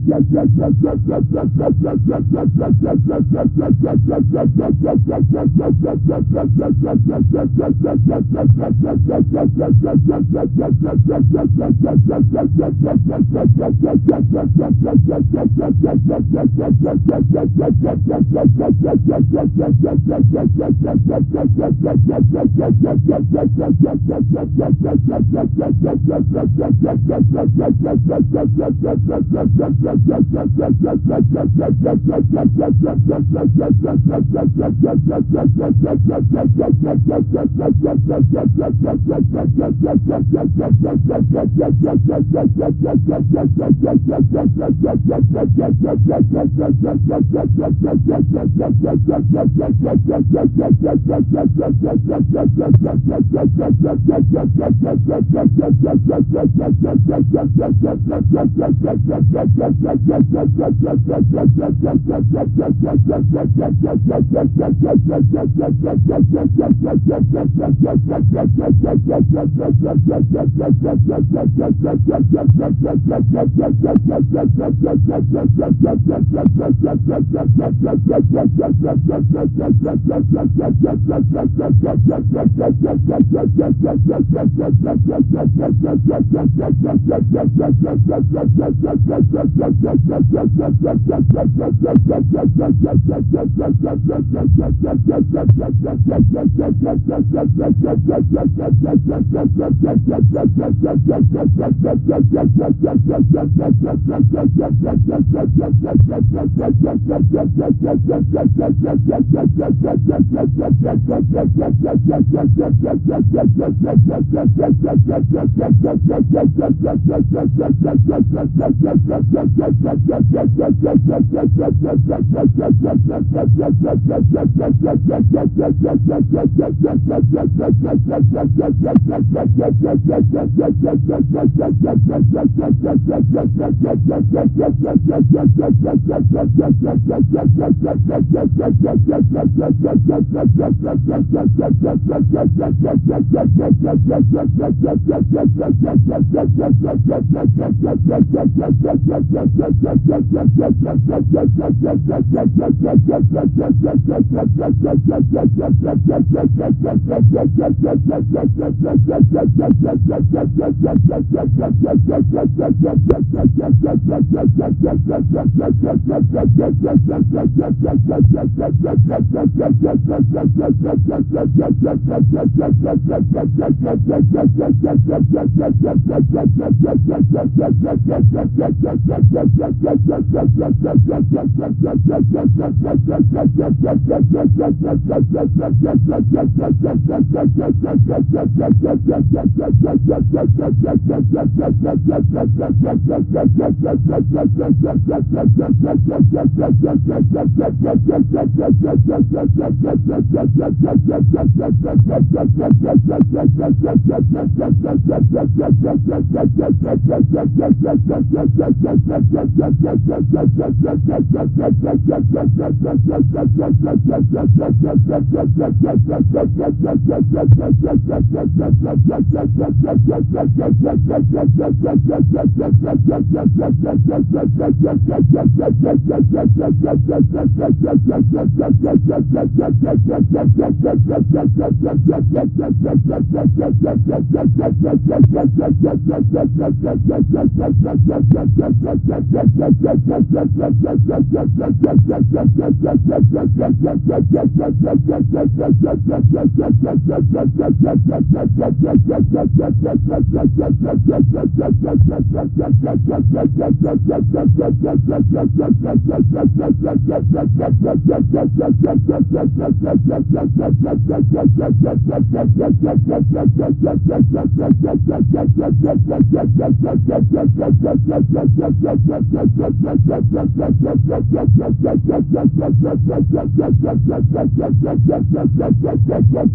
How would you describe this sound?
Sc-Fi sound-01
That was originally a boring industrial water heating system furnace. So I fooled around with audio effects to give that result that sounds like one of those old low budget science fiction movies or even some cartoons. It can be used in many type of stuff, it's up to your imagination.
science-fiction, freaky, unreal, bizarre, future, sci-fi, Foley-sound, weird, effect, strange